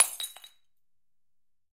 glass - baby food jar - smashed on concrete
Glass baby food jar smashed on a concrete floor.